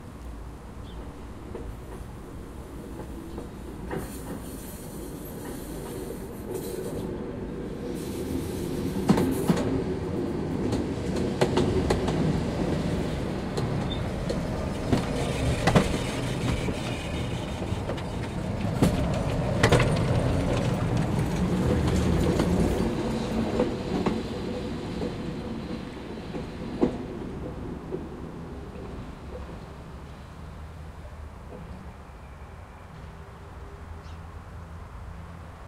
tram at veering 3
Streetcar at veering.
Recorded 2012-10-13.